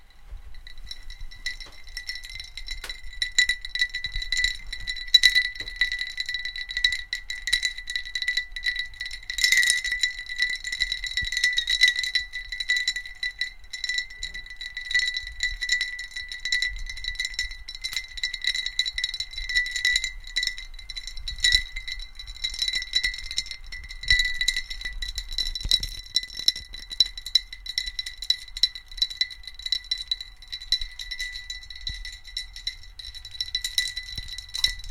Ice cubes in a cocktail glass or pitcher
Ice cubes clinking and stirring in a glass or pitcher of juice, cocktail, beer or water; carried to a garden party or in a bar.